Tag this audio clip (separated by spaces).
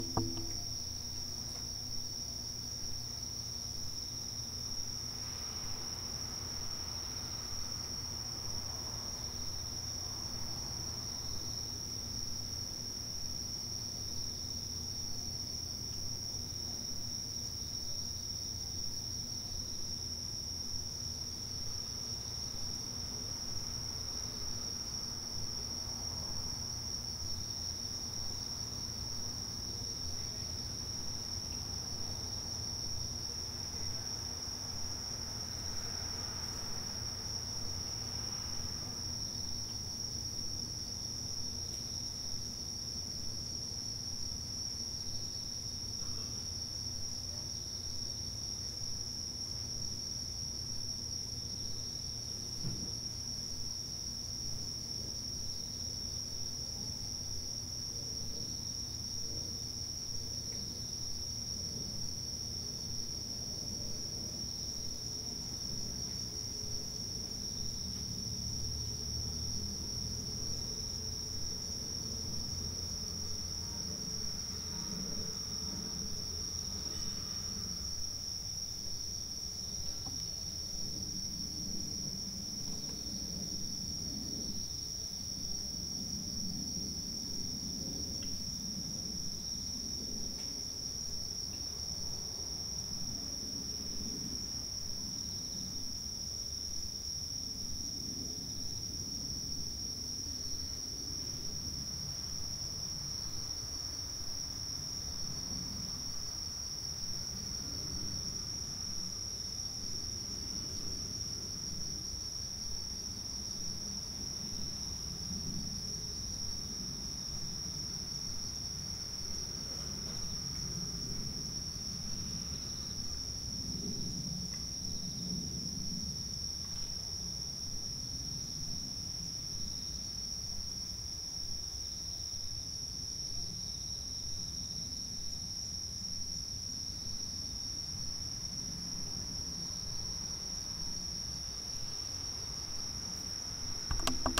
nature cicada country-side field-record soundscape